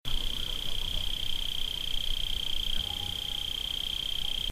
crickets in spring, with a (far) sheepbell in background